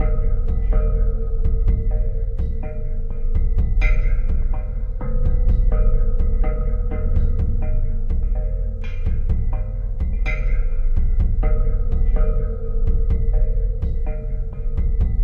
126 Num Ethnic.7
A Numerology Drum Sequencer loop of metallic sounds
sequenced, loop, metallic, 126-bpm, rail, rhythmic